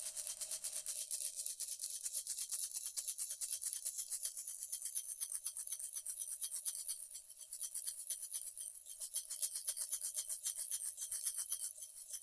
This sound was created by shaking small wooden beads in a glass jar.
Recorded with a Zoom H4N recorder and a Rode M3 Microphone.